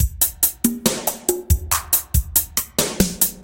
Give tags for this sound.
electronic
beat

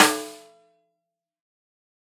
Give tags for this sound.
multisample
velocity
snare
drum

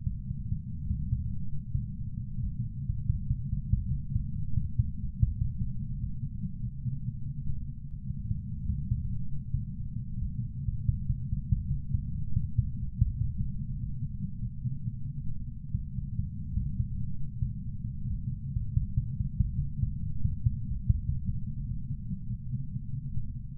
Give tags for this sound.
drone
heartbeat
heart
muffled

